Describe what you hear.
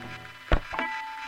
Noise on the guitar track just before recording.
I copied this noise before cut it on the track.
Stack: Stratocaster with Seymour Duncan humbucker sensor -> M-Audio FastTrack Ultra 8R -> Digital recorder.
Mono